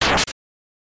Slash - [Rpg] 1
attack, ct, fx, rpg, sfx, slice, sound-design, sound-effect